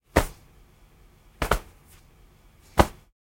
Fall Impacts
Some hand/body hits for a fall.
Animation, Cinematic, Cloth, Fall, Foley, Hands, Impact, Movement, SFX